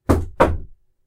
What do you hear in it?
Two Door Knocks
two, knocking, hit, two-bits, wooden, knock, bits, bang, door, closed, knuckles